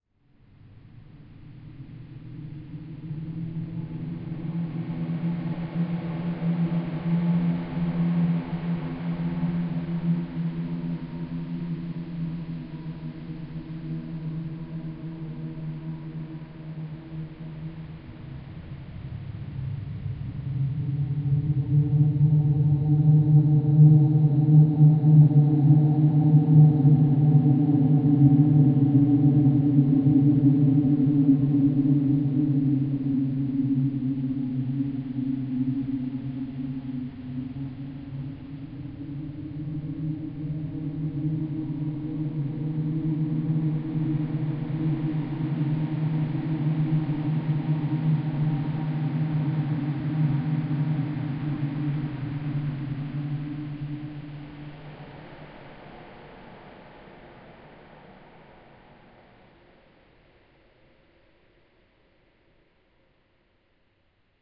Sound made from samples of a chicken.
synthetic-atmospheres, ominous, experimental, atmosphere, sad, scary, roar